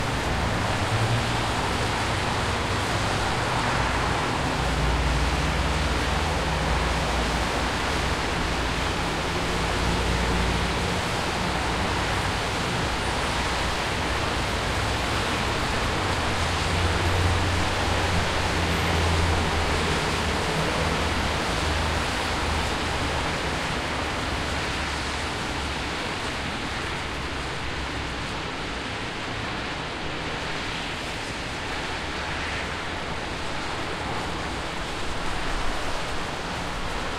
cars on wet street-stereo+center
Noise of cars on the street after rain. M-m-m... no... small rain is falling.
Recorded: 2012-10-19 09 pm.
XY-stereo + central channel variant.
It isn't 2.1 sound! It's stereo + central channel which recorded by super-directional microphone. You can mix (add) it into stereo or subtract it from (just reverse phase).
FYI. Full subtracted mix contain hole at center sound image. You can use it for place yourself sounds as you wish.